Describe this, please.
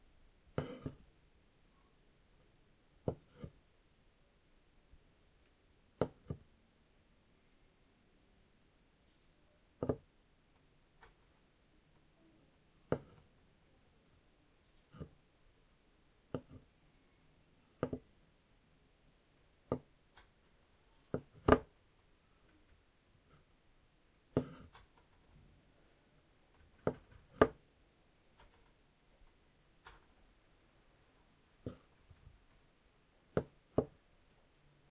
putting some solid objects on the table
wooden, objects, blocks, table, solid